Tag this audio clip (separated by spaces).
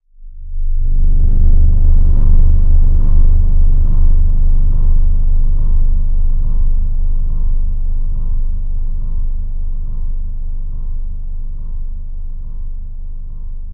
ambience ambient atmosphere dark deep digital drone electronic experimental fx horror noise sample sound-effect space